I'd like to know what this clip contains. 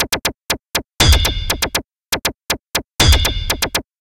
fx loop